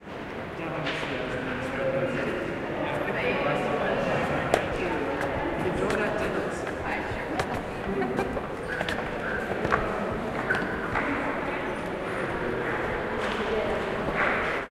br mus tk3 steps 2f
Footsteps on the great staircases of the British Museum in London. There are voices and lots of natural reverb due to the vast size and hard surfaces. There is also a general background noise from ventilation and heating systems. Minidisc recording May 2008.